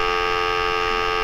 Phone tone
What you hear on a phone when you're not doing anything. Recorded with a CA desktop microphone.
telephone, dial-tone, phone